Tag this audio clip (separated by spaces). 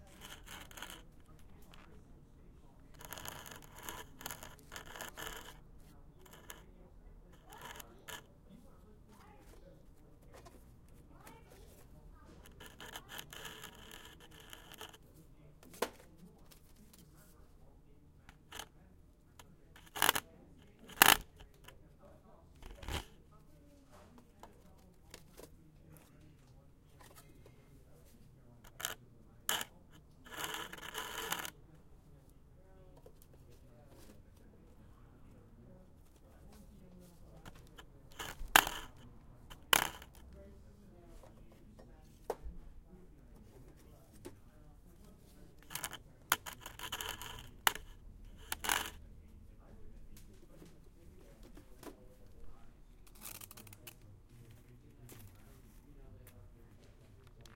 difficult-sound grating hardware-store many-types rough sand sandpaper scratch